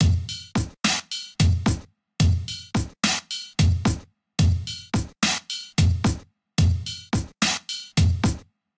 Strange mid tempo dance sample/beats.